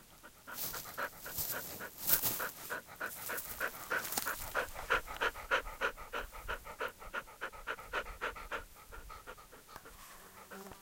20070824.dog.panting
a dog pants. Unsurprising, given the fact that he had just climbed a mountain ddespite being severly disabled.